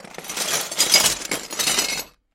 Recorded by myself and students at California State University, Chico for an electro-acoustic composition project of mine. Apogee Duet + Sennheiser K6 (shotgun capsule).